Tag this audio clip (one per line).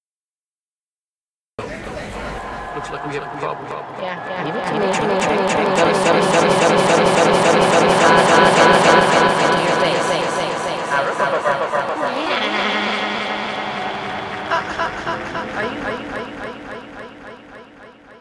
Hilary
Political
Wappaapahaha